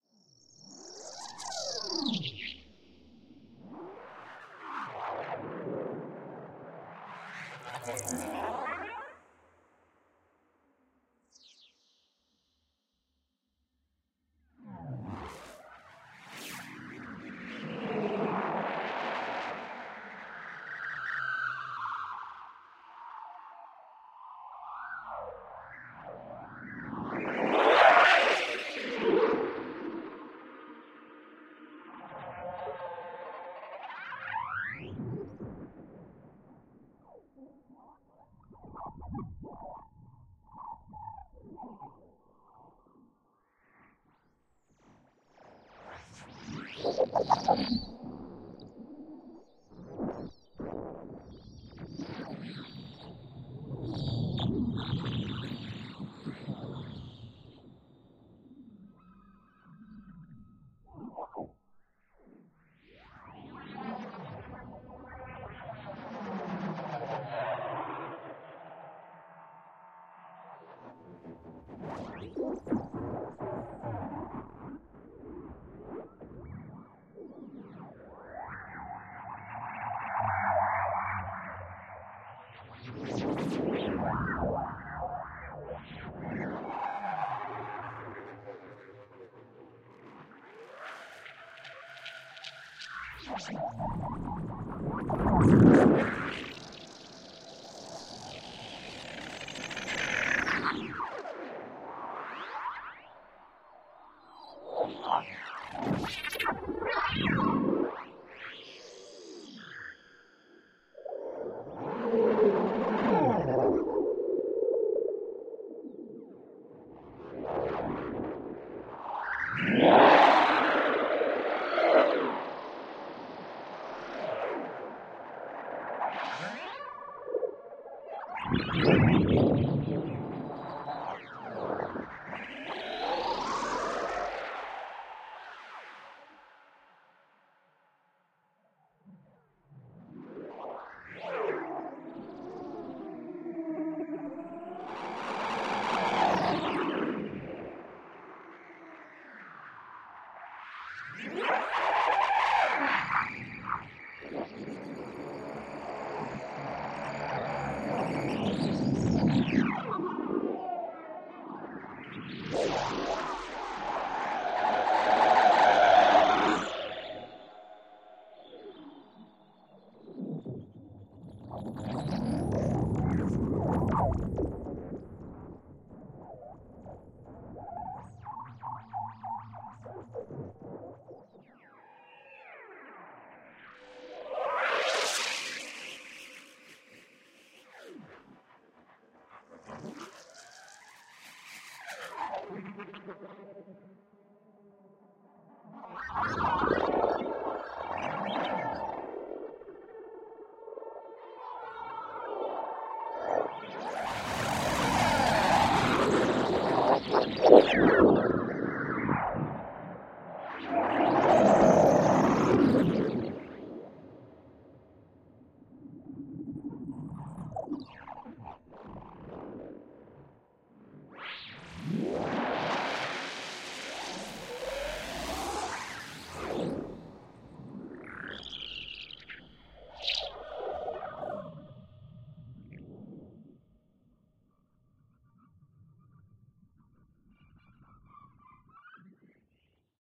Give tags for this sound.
reaktor
drone
soundscape
space

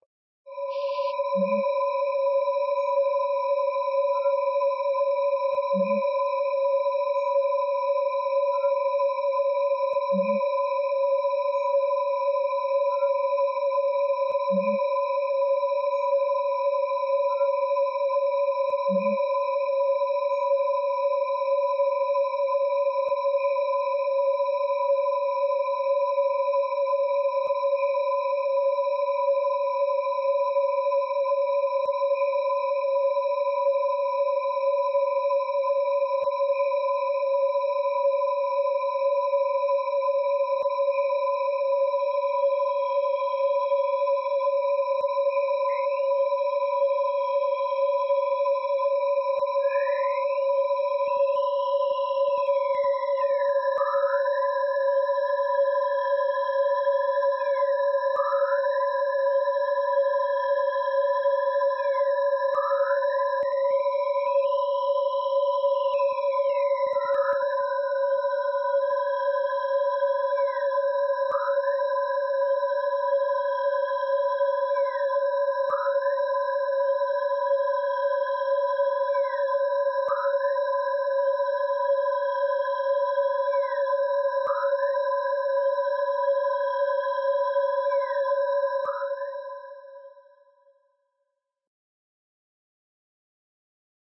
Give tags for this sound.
atmosphere
intro
metro
reverb
electronic
sci-fi
subway
processed
strange
cinematic
theatre
noise
train
ambience
soundscape
eerie
dark
rumble

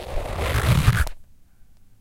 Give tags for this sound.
mat
rubber
rubbing
yoga

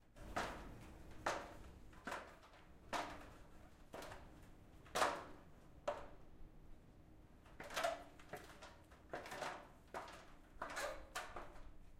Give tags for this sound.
Climbing
Exercise
Falling
Footsteps
Movement
Muscles
OWI
Physical-activity
Stairs
Waling

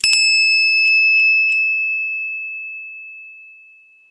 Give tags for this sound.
hand
bell
worshiping
ghantee

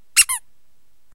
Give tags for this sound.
bm700
dog
squeak
toy

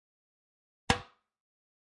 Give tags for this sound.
dispose
metallic
hit
impact
metal
rubbish
iron
garbage

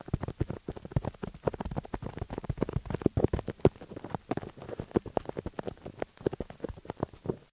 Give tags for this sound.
bubblewrap
dare2

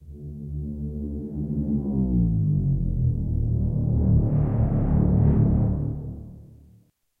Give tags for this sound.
braams
horns
trumpet

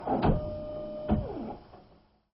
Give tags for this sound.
268791
electronic
machine
machinery
printer
robot

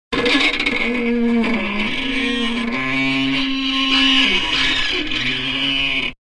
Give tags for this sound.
ghost
squeaky
rust
old
door
scary
farm
rustic
haunting

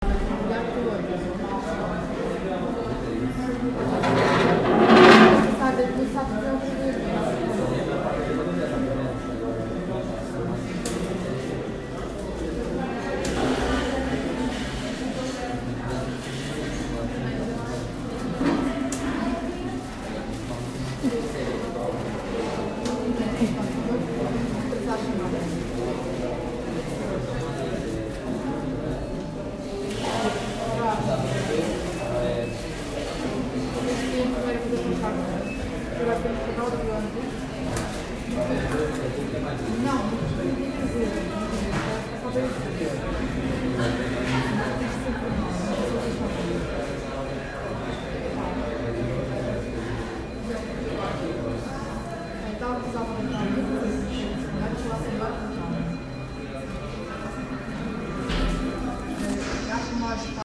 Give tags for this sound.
people-talking
restaurant
lunch

